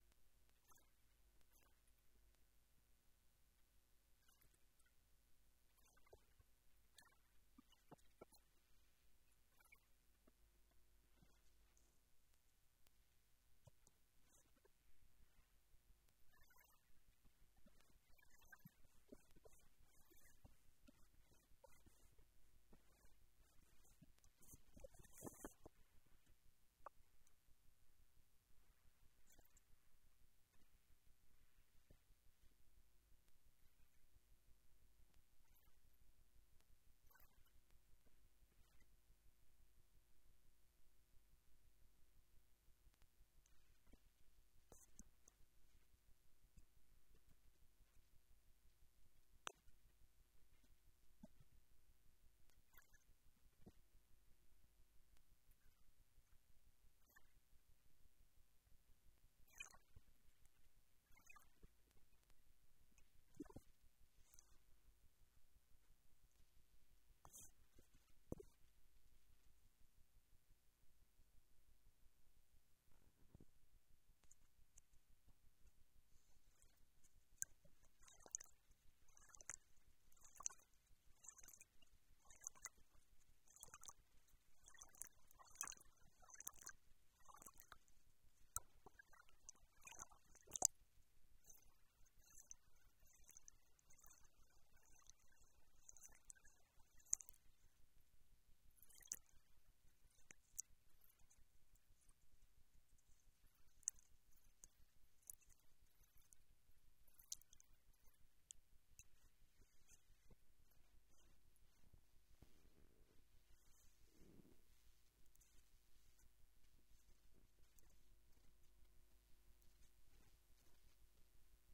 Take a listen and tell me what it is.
A relaxing recording of water recording using a DIY piezo transducer Mic. Samples were recorded by plugging the Hydrophone into a Zoom H1.
foley, liquid, water, bubble, sound, effects, underwater, bubbles